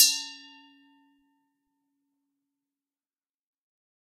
Wine Glass Hit C#4
Wine glass, tuned with water, being hit with an improvised percussion stick made from chopstick and a piece of plastic. Recorded with Olympus LS-10 (no zoom) in a small reverberating bathroom, edited in Audacity. The whole pack intended to be used as a virtual instrument.
Note C#4 (Root note C5, 440Hz).
clean, glass, hit, instrument, melodic, note, one-shot, percs, percussion, percussive, tuned, water, wine-glass